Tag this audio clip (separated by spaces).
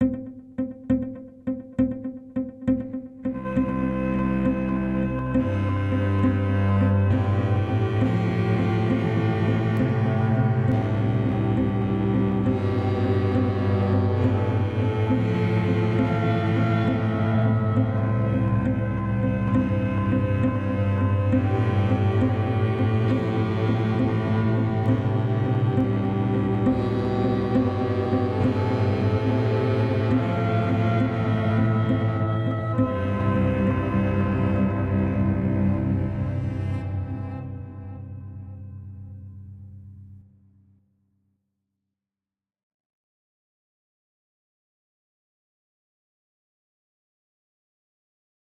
cello viola violin